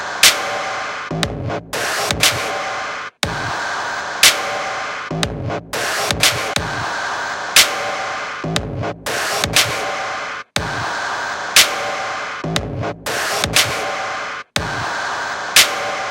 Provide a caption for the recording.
120 BPM Highly Processed Drum Beats